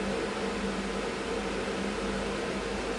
Fume extractor running in it's own pace as heard from afar.